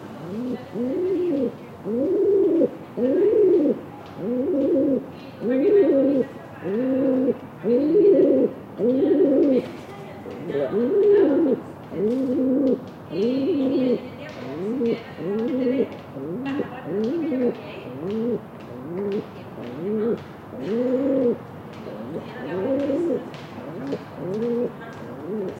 a pigeon cooing at a close distance, some voices in background
birds, cooing, ambiance, south-spain, pigeon, dove, field-recording